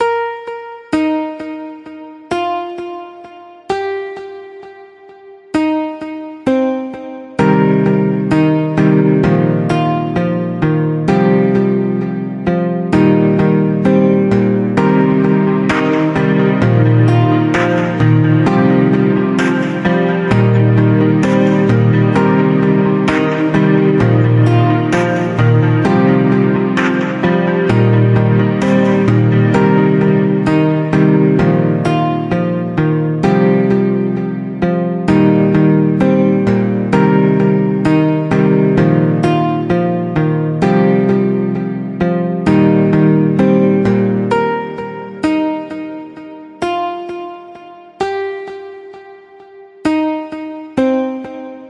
Guitar Music
A looping musical piece made with the Nexus2 VST in FL Studio.
guitar, music, nexus, nexus2, quantized